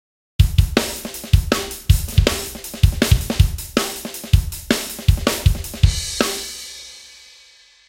Dayvmen with Hihat
this is a new take on an old favorite. my version of the Amen break, with different accents, fills, and rolls. and of course fresh drum sounds. Created with Reason and RDK 2.0. this is the version with the hi-hats.